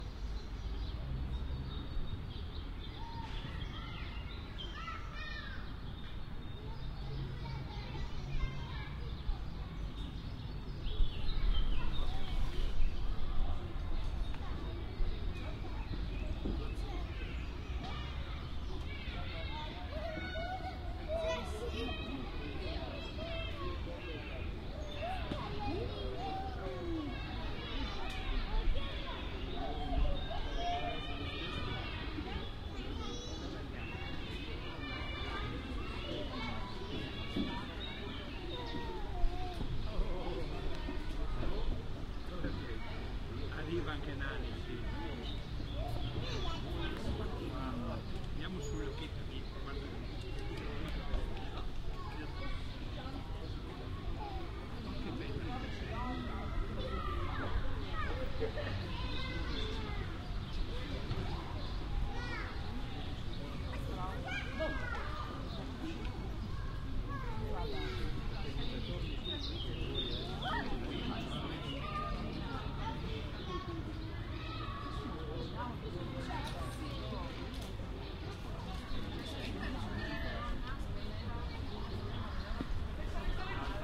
A sunny afternoon in a city park, with kids playing, moms dragging their baby cars and people talking in italian.